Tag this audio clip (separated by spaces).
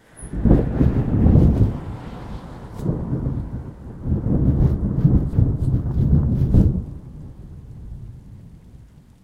north-america ambient thunder west-coast thunder-clap thunder-roll lightning field-recording weather storm